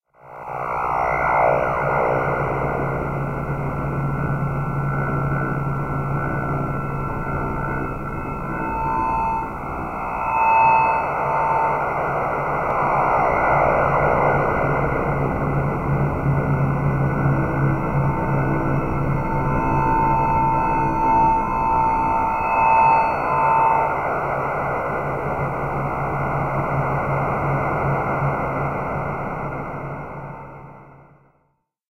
pulsar synthesis 04
Sample generated with pulsar synthesis. A low-pitched drone with FM like chirps and washes in the higher registers.
drone, noise, pulsar-synthesis